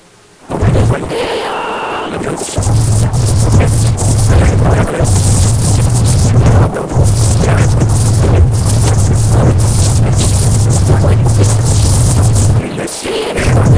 sample exwe 0177 cv rae 02 lm lstm epoch32.96 2.8472 tr
generated by char-rnn (original karpathy), random samples during all training phases for datasets drinksonus, exwe, arglaaa
generative, char-rnn, recurrent, neural, network